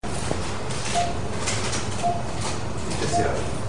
Geräusche aus einem Operationssaal: Surgery room ATMO with clinical operating room background, directly recorded during surgery